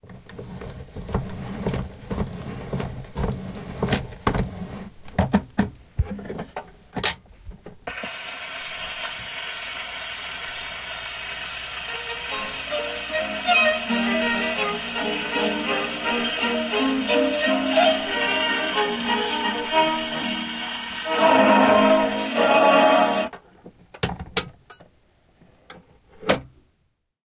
Content warning
This is an old gramophone with a 78rpm vinyl-record. The sounds include the winding-up, the placement of the needle, crackle-noise and other surface sounds of the vinyl, lifted-up needle and the sound of the vinyl record-player stopping.
Recorded in stereo on a Zoom H4 handheld recorder.
warm-vinyl, crackle, vinyl, surface-noise, gramophone, record, gramophone-noise, hiss